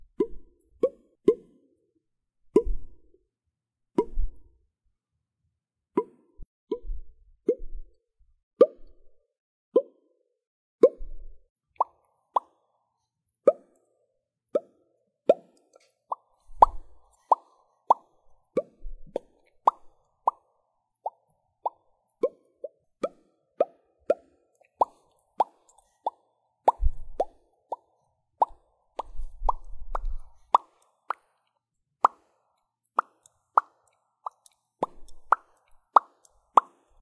popping sounds
Various popping noises made by mouth.
drip, drop, pop, popping